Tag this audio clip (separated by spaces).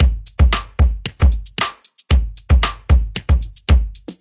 114bpm clean club disco dry kick lazy loop snare